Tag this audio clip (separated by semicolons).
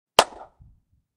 dry; handclap; slap